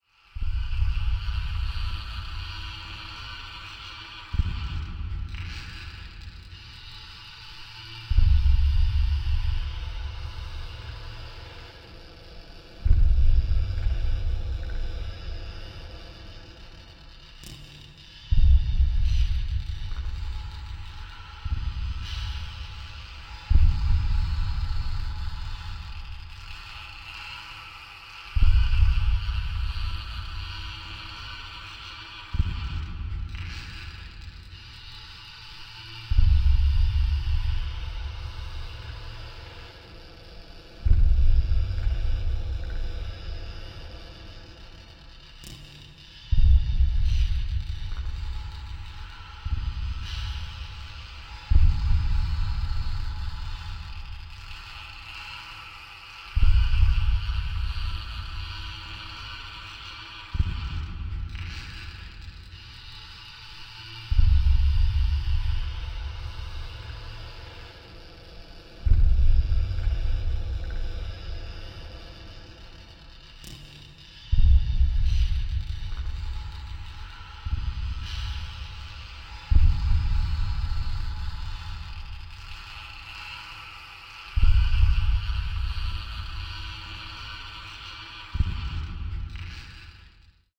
metallic creak with reverb
i have this drummer's stool that creaks when you rotate it. so this is that sound. metal scraping against metal through reverb, echo, and harmony pedals into a delay/looper